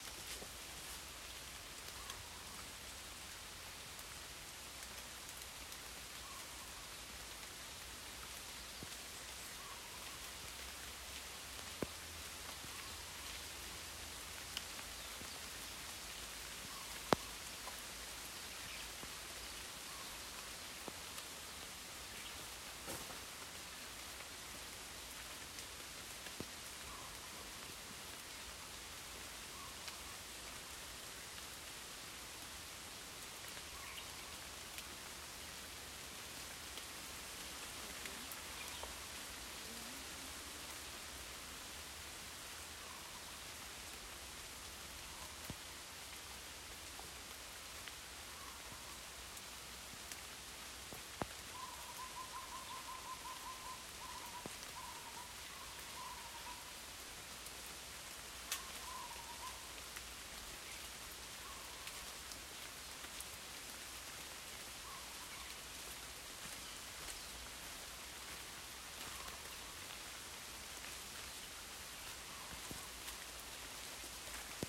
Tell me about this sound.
Light rain in the forest
Light rain in the middle of a "nature walk" in Black River Gorges National Park.